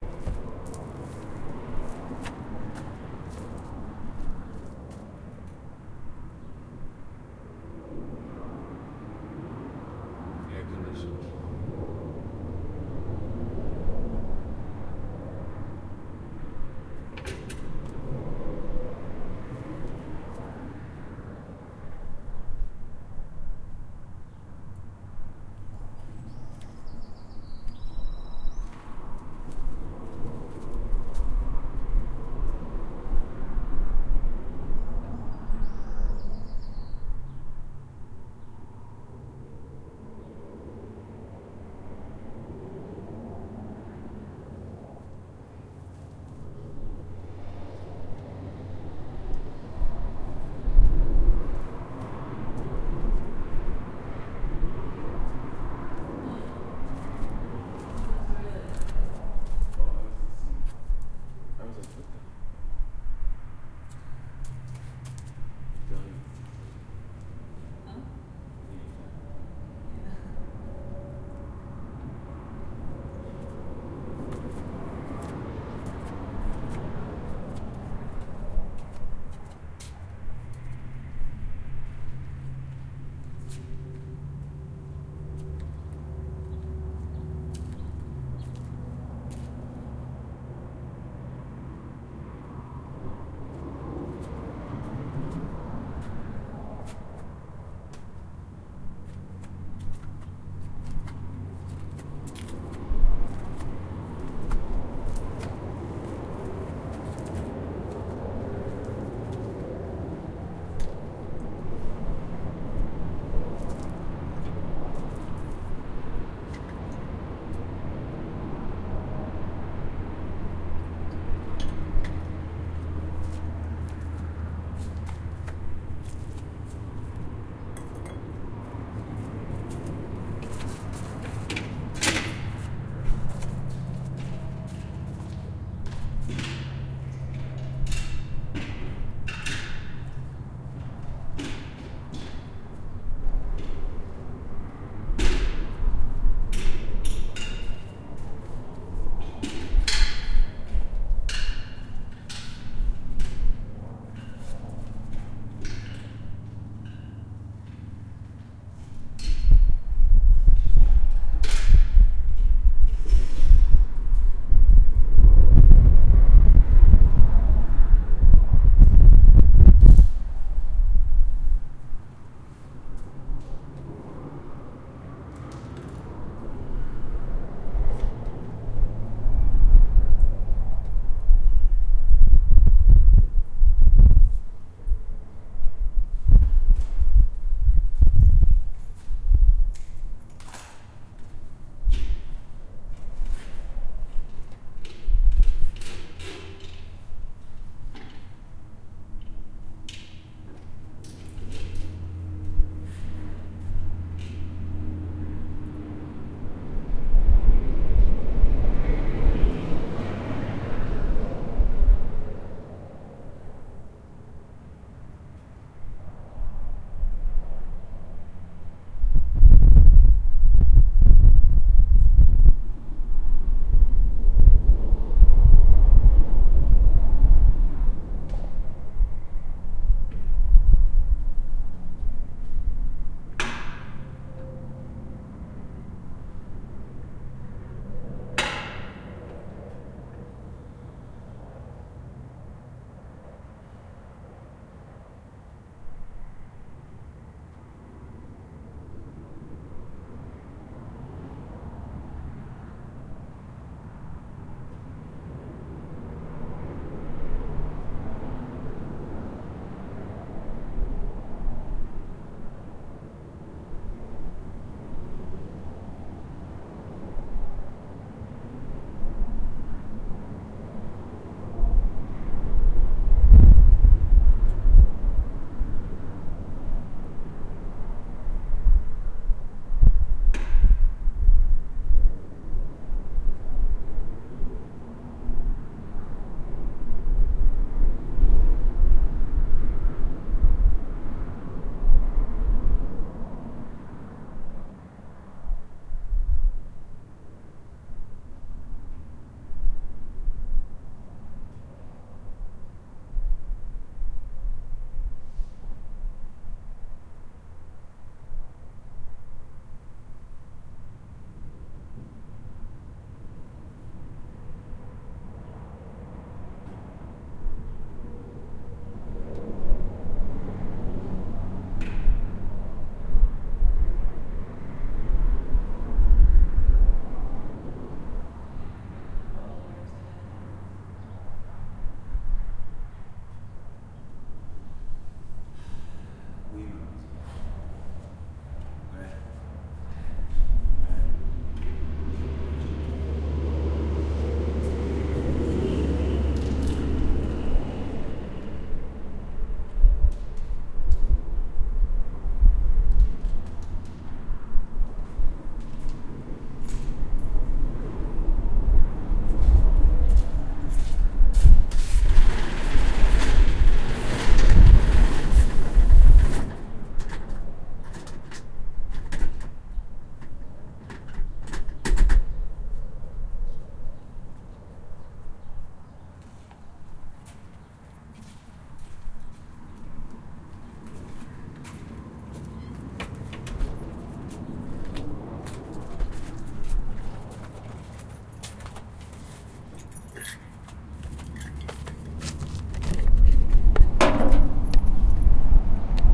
zoom h4n - santa fe new mexico - southside near the 14 and 25 interchange.